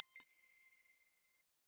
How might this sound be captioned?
A digital sound effect intended for video game/menu use. Bright, digital windchimes. Will upload the full pack soon.
effect, digital, computer, serum, bleep, hud, short, sfx, sound-design, synthesizer, synth, noise, machine, automation, clicks, command